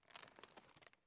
Breaking a rotten chair under my foot.